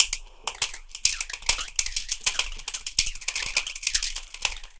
Chinese Stick Remix wah 69
A Chinese Stick percussion played by a drummer and re-mixed with some effect like wah-wah and phaser.